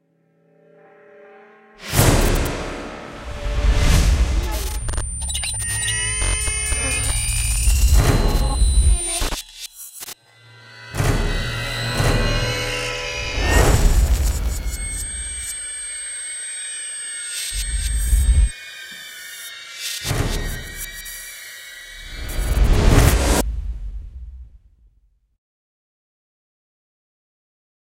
Texture of Metal and oxide impacts.
Big twister of metal.